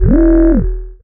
PPG 018 Acidic Bleep Tone E1
This sample is part of the "PPG
MULTISAMPLE 018 Acidic Bleep Tone" sample pack. It make me think of a
vocoded lead and/or bass sound with quite some resonance on the filter.
In the sample pack there are 16 samples evenly spread across 5 octaves
(C1 till C6). The note in the sample name (C, E or G#) does indicate
the pitch of the sound but the key on my keyboard. The sound was
created on the Waldorf PPG VSTi. After that normalising and fades where applied within Cubase SX & Wavelab.
ppg, lead, bass, multisample, vocoded